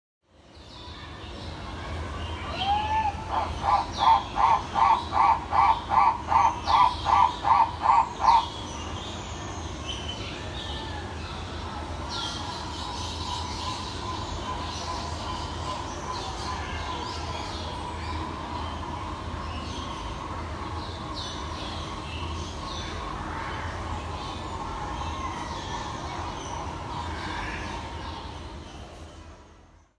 Recording of a unique bird call I recorded at Chester Zoo. Unfortunately, I could not identify the bird.
Animal, Bird-Call, Zoo